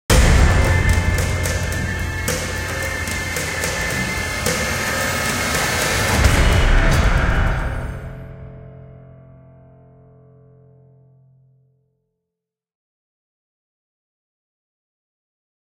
Drum End8
This set of bit for your finals or transition in the trailers.
Use this for your epic cinematic trailer! Or...as you wish :) it's 100% free for all!
Real-time recorded, in Edison by FruityLoops.
In the end, i use Sony SoundForge for volume control edited.
Thanks all, and Enjoy, my Best Friends!
drum, horror, movie-trailer, rhythm, epic-sound, bass, trailer, tribal, trailer-end, dance, loop, epic-drum, deep, beat, end-trailer, mystic, kick, transition-beat